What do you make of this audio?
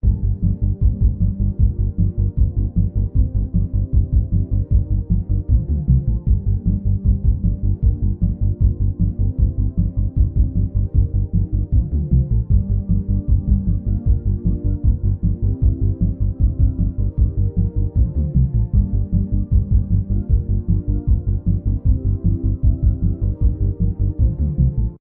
Small self composed music loop filtered to sound like it's heard from outside/next door.
Including my name (prefered spelling: "TitanKämpfer", if the work's font allows it) somewhere in the credits is enough. Linking to this profile or the sound source itself is fully optional, but nice to see.